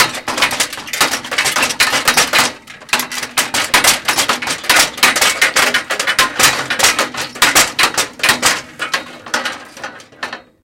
Sounds For Earthquakes - Metal
I'm shaking some metallic stuff. Recorded with Edirol R-1 & Sennheiser ME66.
collapse, collapsing, earth, earthquake, falling, iron, metal, metallic, motion, movement, moving, noise, quake, rattle, rattling, rumble, rumbling, shake, shaked, shaking, shudder, stirred, stutter, suspense, waggle